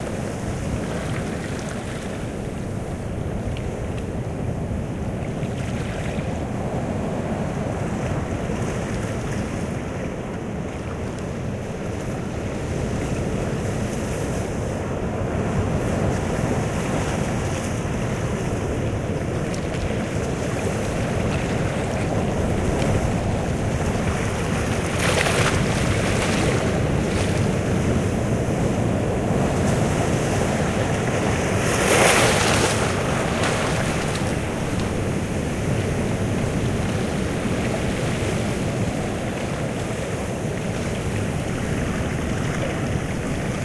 Waves crashing against rocks recorded at Ballycotton Harbour, Ireland. Recorded with a Zoom H4 audio recorder. 12/04/2015